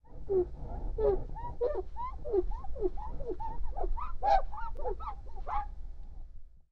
wipe glass window - clean
The sound a cloth makes when you're cleaning glass. Simple as that. I think I recorded this with my Zoom H4